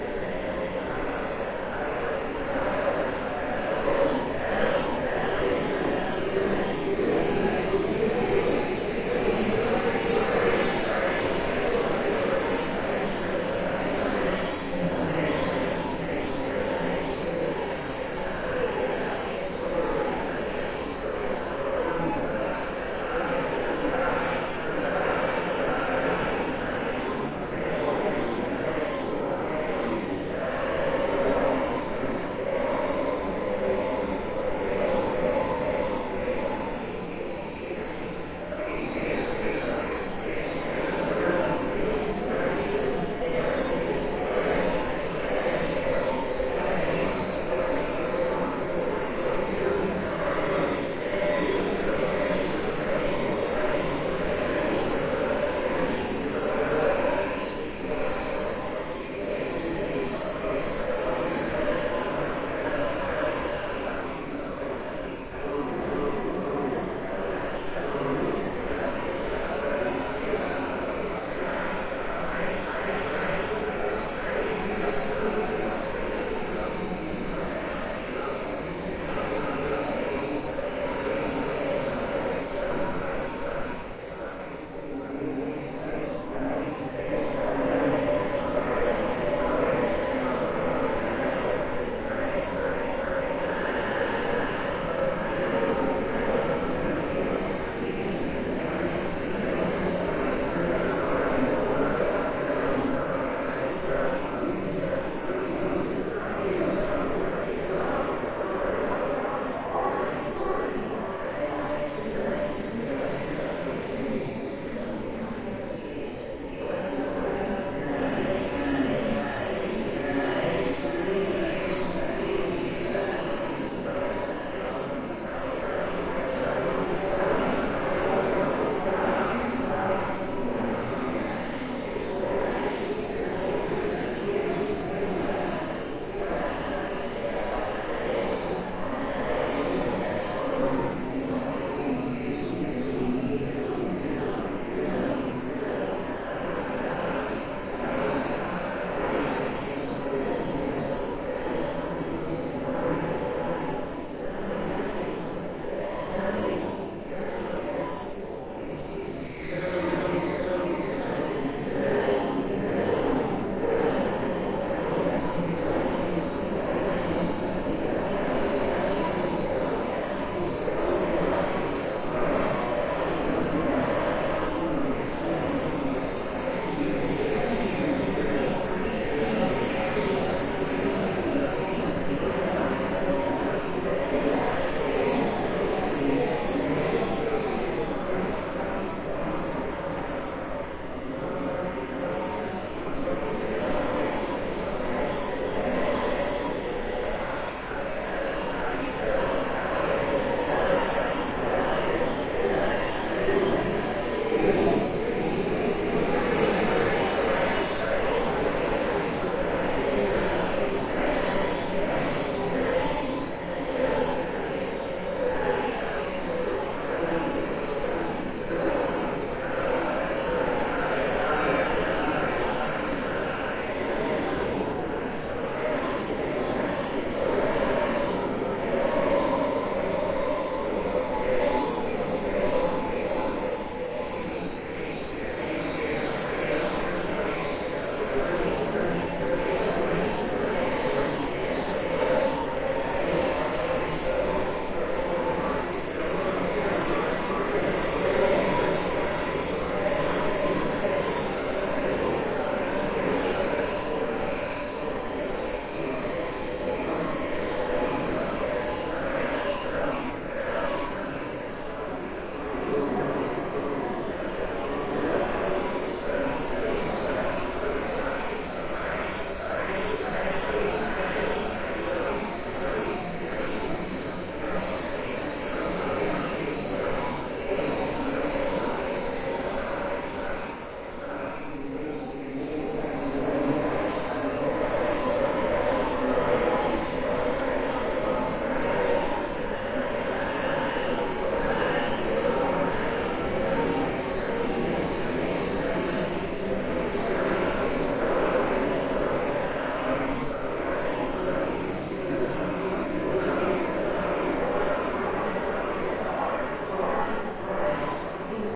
Granular Voice
Granular processed voices.
Noise, Experimental, Ambient